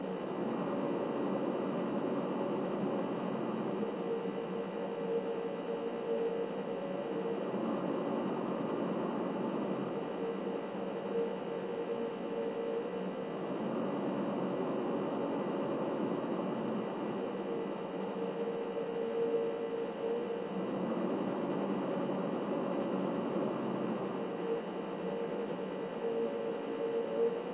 the sounds of a refrigerator breathing near a sony pcmd-1, in loop form.
refrigerator, breathing, fridge